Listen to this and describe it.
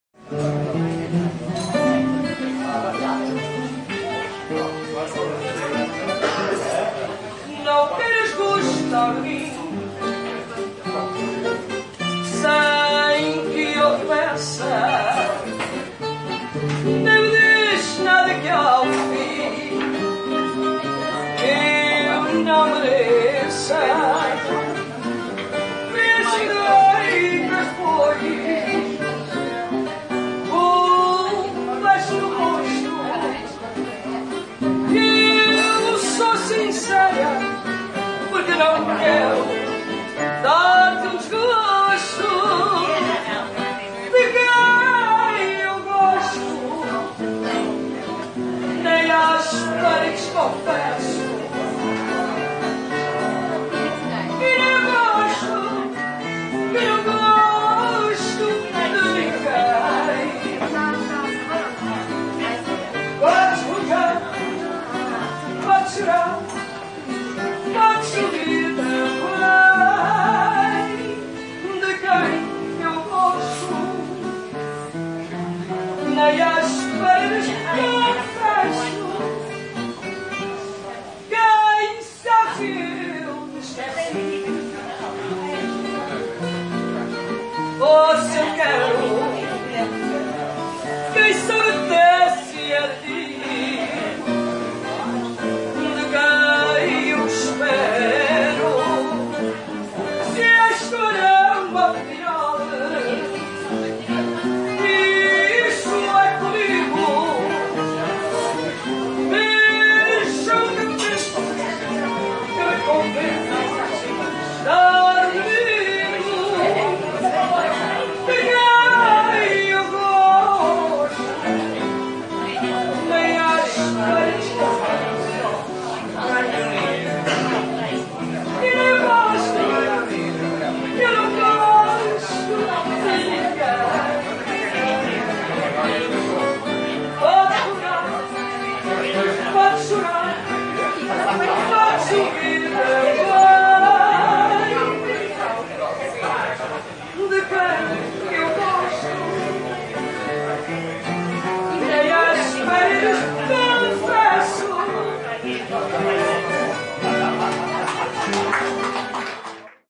20191117 202655 POR Fado
A Fado singer (woman)) in Lisbon, Portugal.
Here, one can hear a lady singing a traditional Portuguese song in a small restaurant located in Lisbon. In the background, people are chatting and eating.
Fade in/out applied in Audacity.
Please note that this audio file is extracted from a video kindly recorded in November 2019 by Dominique LUCE, who is a photographer.
ambience, atmosphere, Fado, field-recording, guitar, lady, language, Lisboa, Lisbon, music, noise, Portugal, Portuguese, restaurant, singer, singing, song, traditional, typical, voices, woman